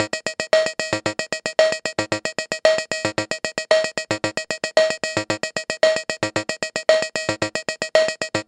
pss-130 rhythm 16beat
A loop of the 16-beat rhythm from a Yamaha PSS-130 toy keyboard. Recorded at default tempo with a CAD GXL1200 condenser mic.